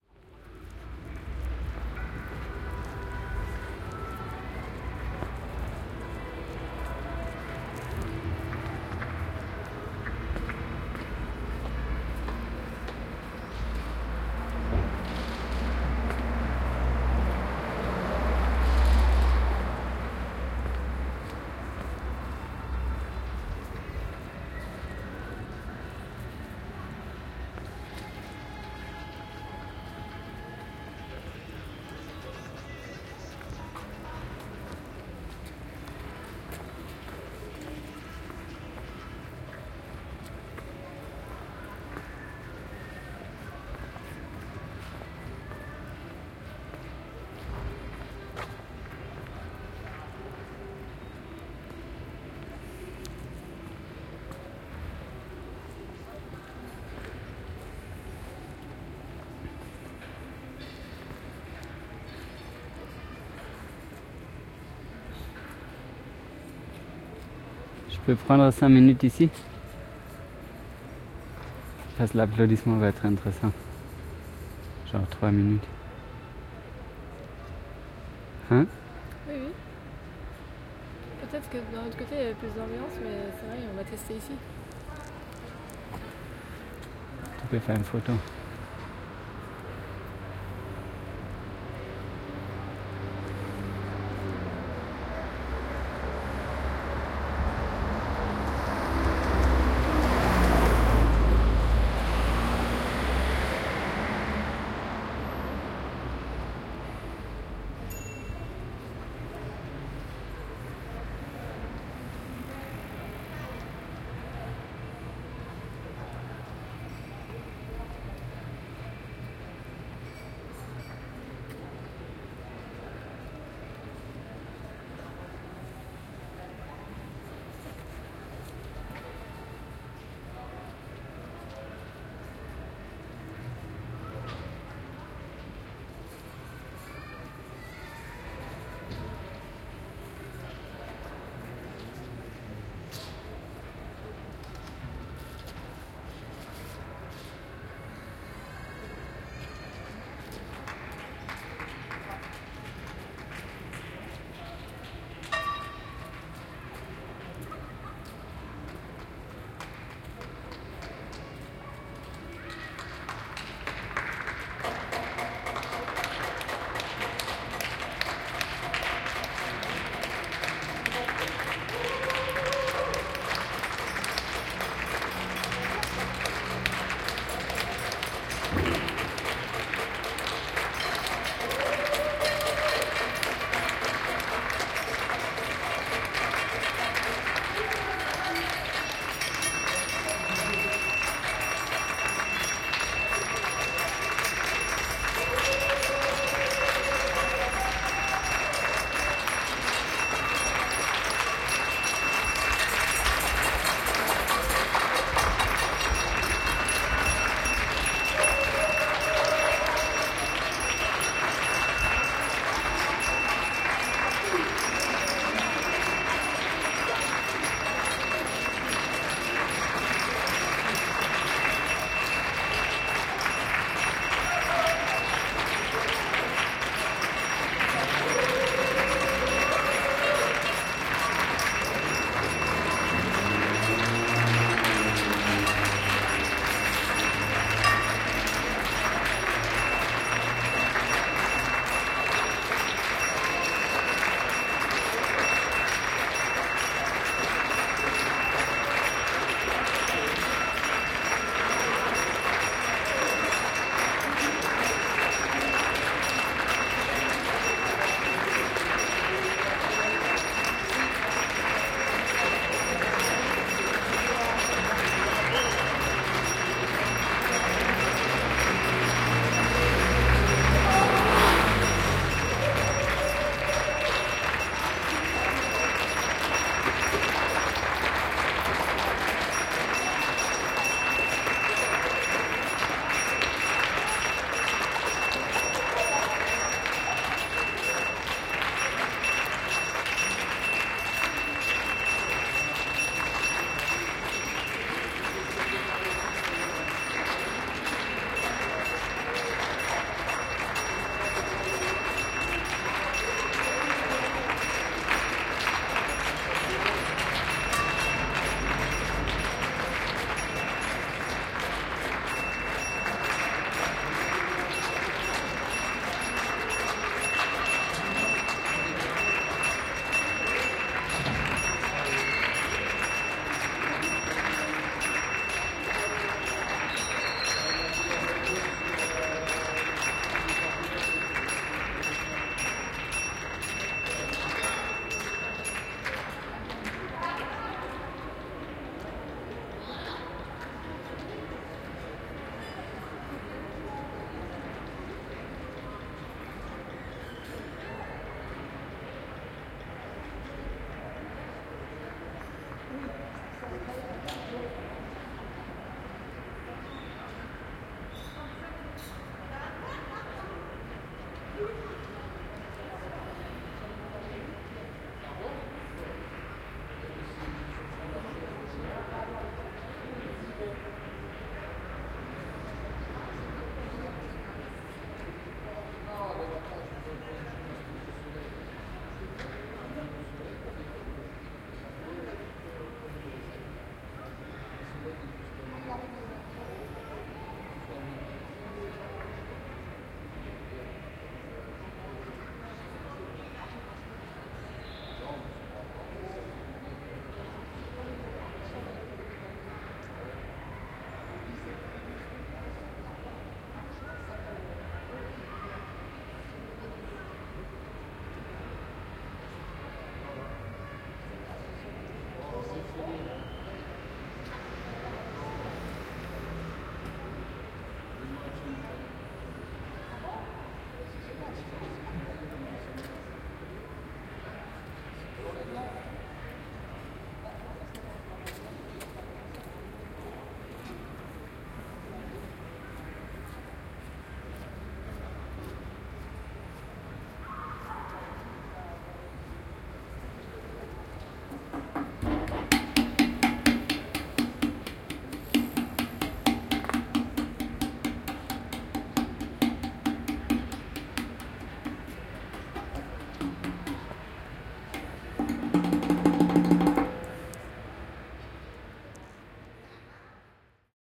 Paris Covid19 Applause Rue Leon Frot
daily applause for the people who help in these hard days of covid 19
n.b. this is a BINAURAL recording with my OKM soundman microphones placed inside my ears, so for headphone use only (for best results)